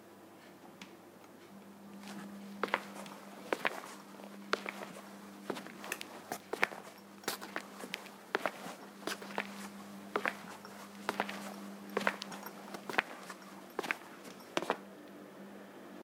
Recording of footsteps of a male walking on a tile floor wearing hard rubber slippers. Some background noise.
recording path: sanken cs2 - Zoomf8
Steps, floor, footsteps, inside, shoes, slippers, tile, tiles, walk, walking